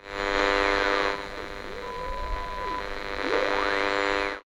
radio frequency tuning noises